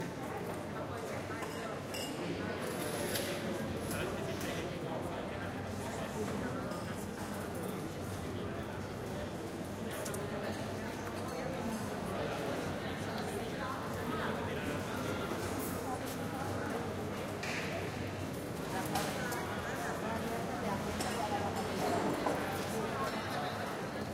Barcelona street ambience small cafeteria outdoors
field-recording, Spanish, soundscape, background, town, outdoors, people, restaurant, Barcelona, noise, ambience, ambiance, memories, cafe, atmosphere, street, ambient, background-sound, atmo, city, cafeteria